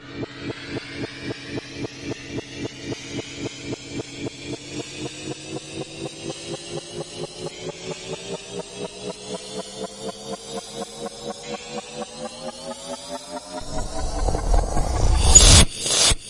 UFO start
This is my first Sound I recorded and edited by myself. It is made by a pen touching metal, and an easy clap sound
Laser, Start-off, Pulse